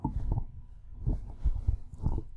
random rummaging
Do you have a request?